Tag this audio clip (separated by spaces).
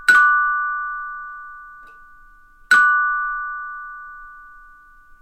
doors bell